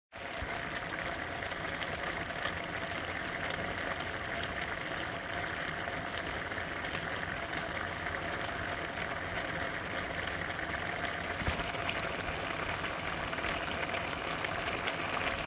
A close-up sound of tomato sauce bubbling aggressively.
soup, boiling